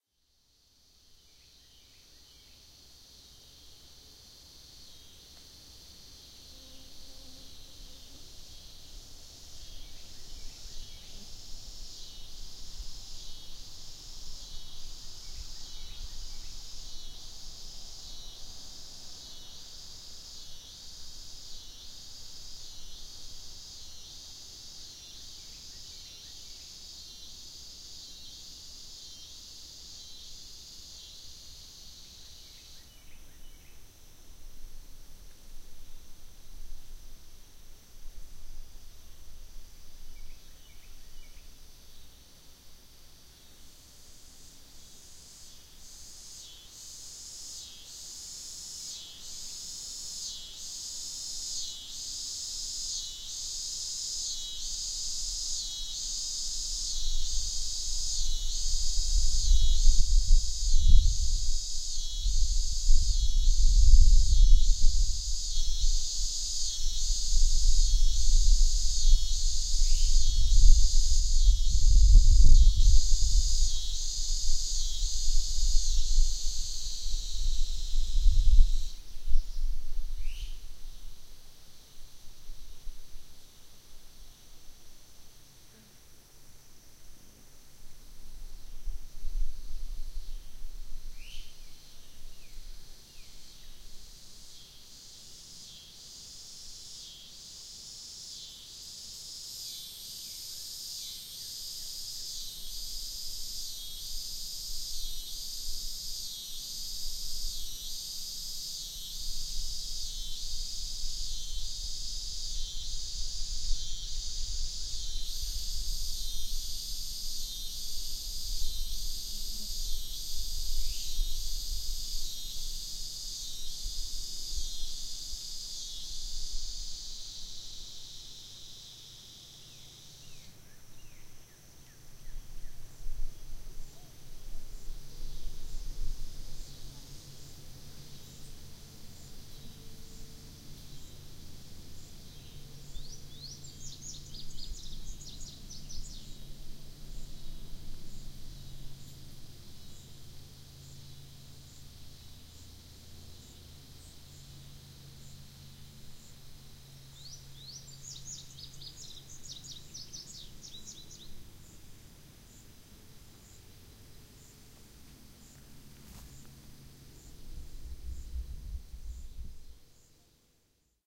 A classic deep woods, summer soundscate. The insects' droning seems to slow everything down as the high tempuratures bake the land. Every now and then you'll hear some bird calls in this recording. Towards the end, the insects temporarily stop and a goldfinch answers with his/her own call.
summer-woods, woods, field-recording, deep-woods, cicadas, summer, forest, sound-scape, insects, nature